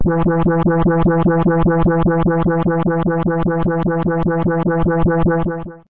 Alien wahwah
A sound effect representing a paranormal event in a game
Want to show me what you do? I'd love it if you leave me a message
alien, effect, loop, processed, sfx, synth, wahwah